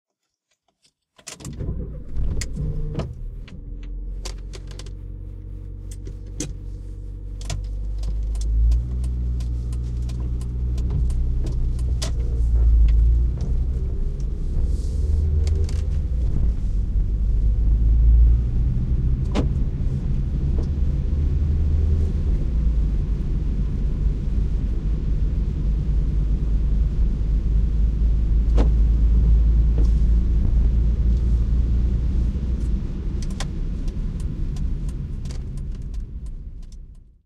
This a recording of a Ford Escort 1.4 from 1993, starting up and driving. You can hear a handbrake being released, the whiskers, the turnlights and maybe a little rain. The road sounds wet from the rain. You can also hear me shifting gears, from first to second and third.
Recorded with a TSM PR1 portable digital recorder, with external stereo microphones. Equalized and noise reduced in Audacity.